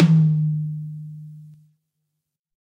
High Tom Of God Wet 008
pack, tom, drumset, kit, set, realistic, high, drum